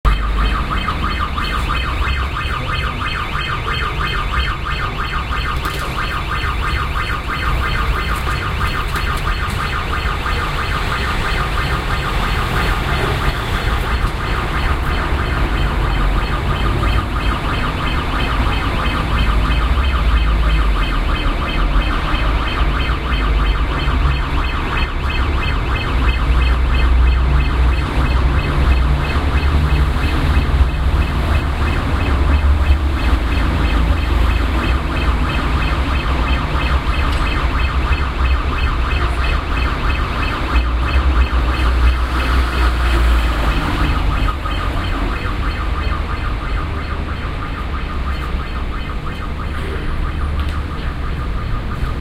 Moorgate - Shop alarm going off
london, background-sound, general-noise, ambiance, field-recording, ambient